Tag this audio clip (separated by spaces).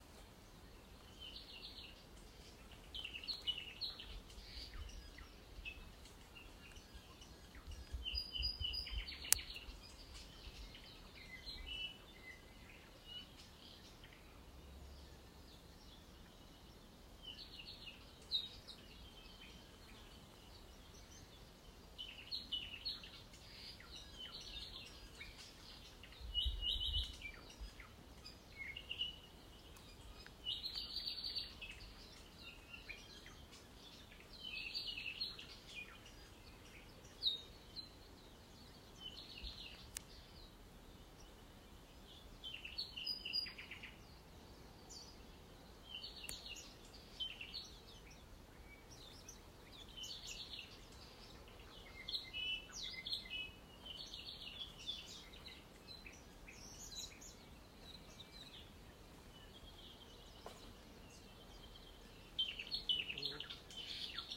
birds pacific rain-forest field-recording trail rainforest bird nature national-park volcanoes-national-park jungle hawaii south-pacific